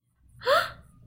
surprised "oh"
some girl shocked. i made the voice and recording